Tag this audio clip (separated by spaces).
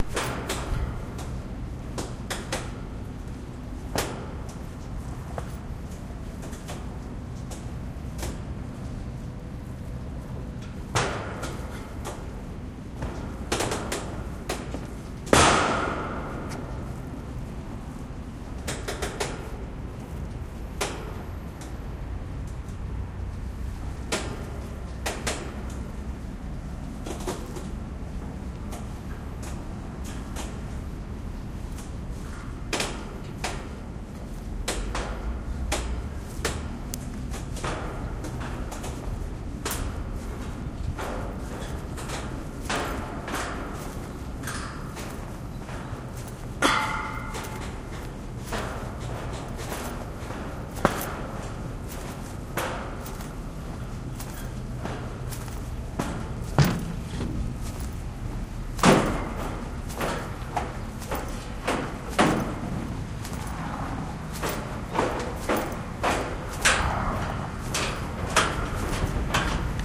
bang field-recording pier water